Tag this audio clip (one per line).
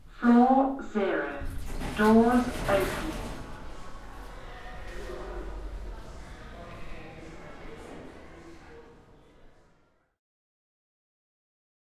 doors
Floor
zero
opening
lift